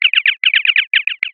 CommunicatorChirp STTOS recreated
A recreation from scratch of the iconic chirpy noises emitted by a communicator in the original series of Star Trek. This sequence of 10 chirps is patterned after an original sound effect, but not created from it in any way. The sound of each chirp is not just a downward sweep of tones (I used square waves), but the key aspect to getting it to sound right is a very steep pass-band filter. The envelope of each chirp is also patterned after the original, but not an exact duplicate. This might make a great ringtone for trekkies. I would use it myself if only I could figure out how to get it into my dumbphone. For that purpose, however, you might need to add a gap of silence at the end (not sure how ringtones are set up, really).
sci-fi, ringtone, sttos, communicator, star-trek